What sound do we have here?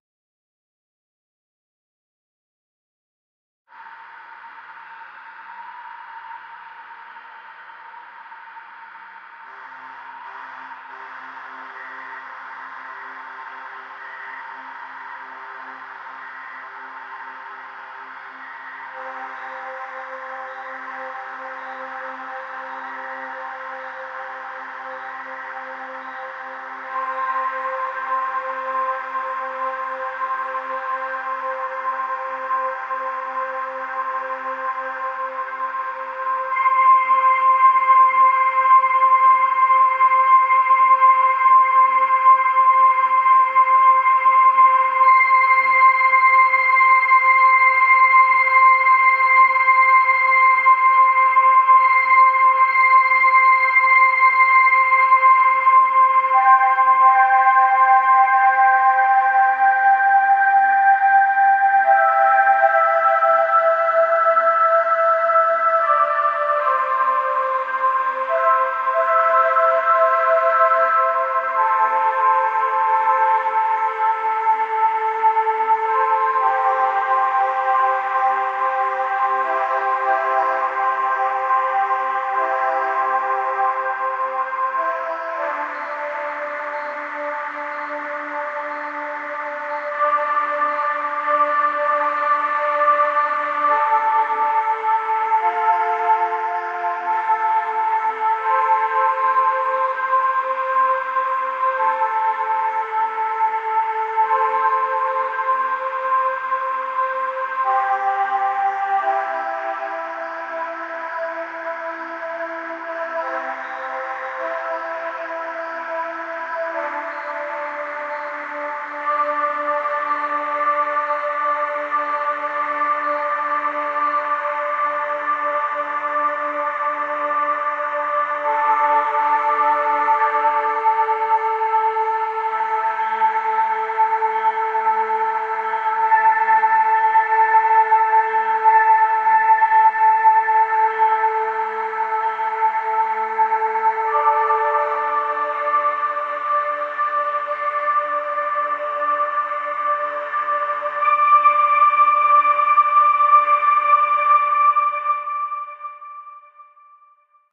Mistery Solved
Sinister beginning, followed by calm tones. Playing my keyboard, slow notes. Used it for background for narration of a video about the universe.